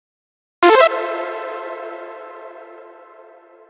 A success sound made by FLStudio with a little reverb. Can be used for a computer sound when you do something right or ortherstuff like that.
computer, digital, future, machine, sfx, sounddesign, Success